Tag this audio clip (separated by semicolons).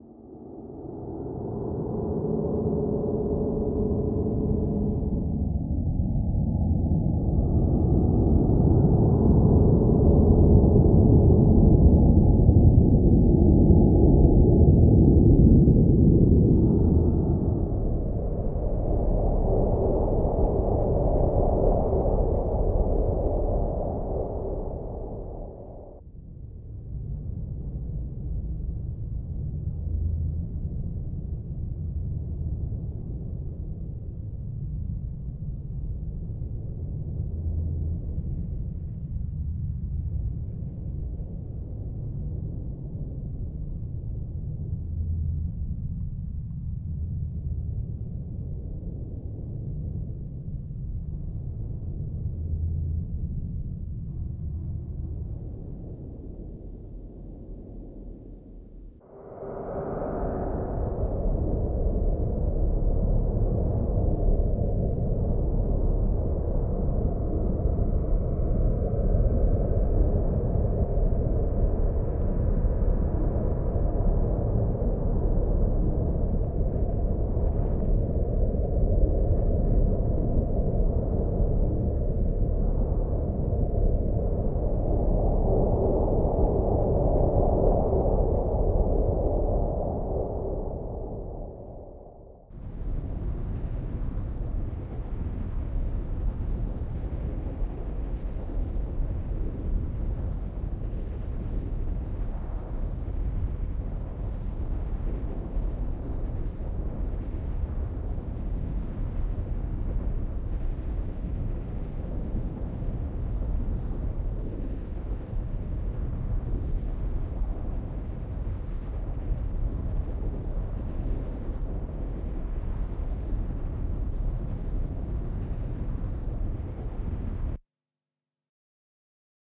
Giuseppe-Cordaro; Makenoise; Morphagene; Sicily; Volcano